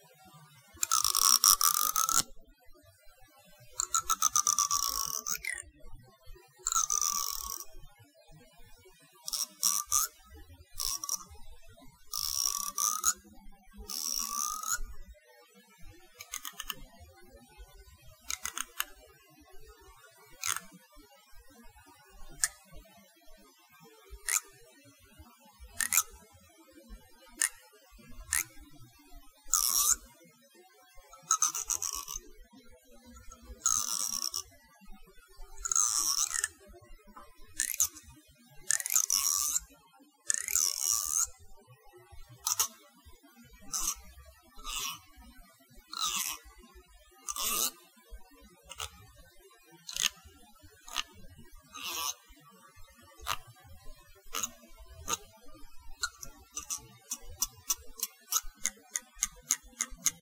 comb teeth zip
running my nails along the teeth of a comb